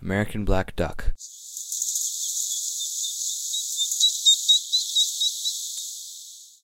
This recording is of a you American Black Duck that was squeaking away as it tried to get to it's mother.
song, bird, bird-song, wild, birds, call, nature, north-america